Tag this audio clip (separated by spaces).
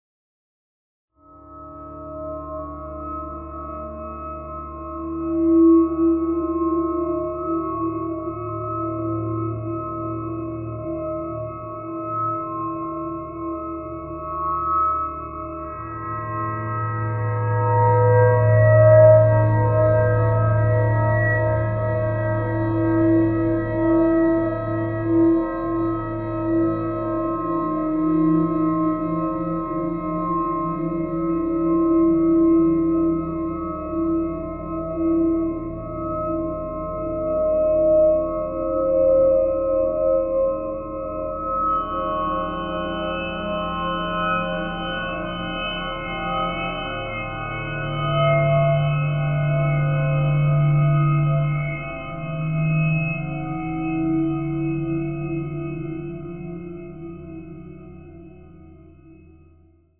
Zebra glassy metallic synthesized soundscape